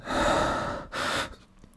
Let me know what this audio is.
Breath Scared 05
A male agitated scared single breathing sound to be used in horror games. Useful for extreme fear, or for simply being out of breath.
breath, breathing, epic, fantasy, fear, frightening, frightful, game, gamedev, gamedeveloping, games, gaming, horror, indiedev, indiegamedev, male, rpg, scared, scary, sfx, terrifying, video-game, videogames